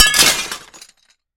Recorded by myself and students at California State University, Chico for an electro-acoustic composition project of mine. Apogee Duet + Sennheiser K6 (shotgun capsule).